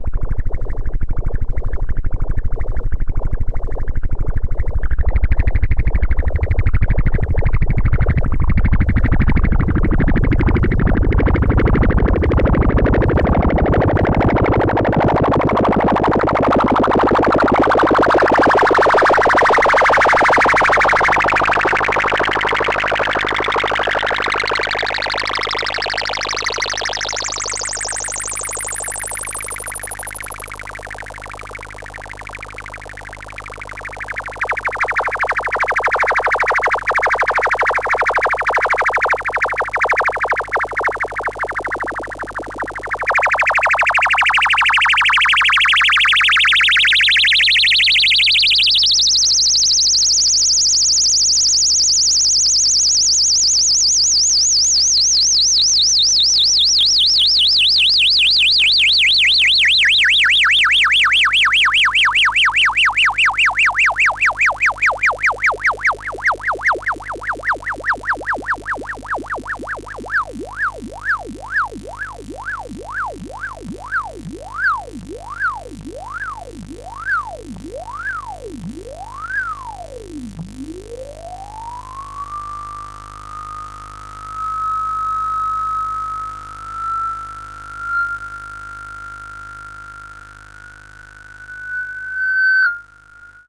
Analog bubbles 1
Kind of a "long analog bubblebath". Made with Waldorf Pulse routed through a Sherman Filterbank, some serious knob-twisting is applied (Filter freq, resonance, LFO speed, etc.)
analog, noise, electronic, long